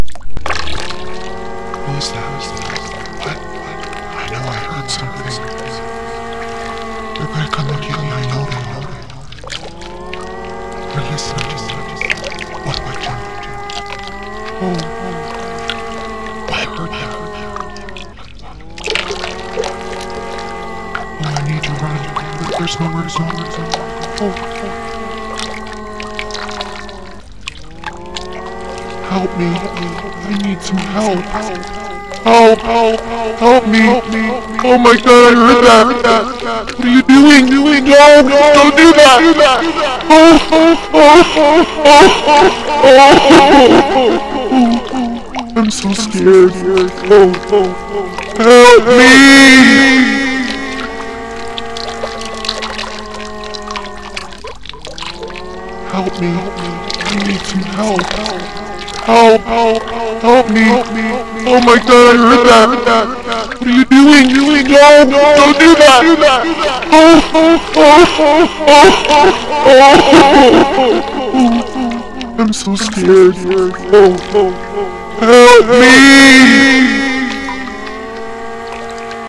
Help me horror cry
help me cry of desperation by a person being bothered by demons and mental torment by wife did the verbal cry and a tri mix of her voice at three pitches as the demons with water dripping recorded in my kitchen sink with hand held mic on my laptop. I find doing my own sounds are much easier than doing video productions and it is a lot of fun.
cry, demons, desperate, erie, help, horror, me, moan, moaning, monster, person, torment